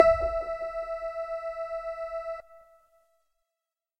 Big bass sound, with very short attack and big low end. High frequencies get very thin... All done on my Virus TI. Sequencing done within Cubase 5, audio editing within Wavelab 6.